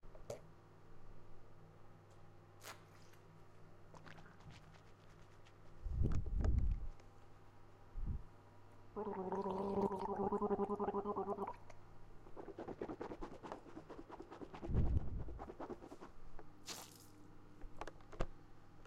Here i recorded myself gurgling mouthwash.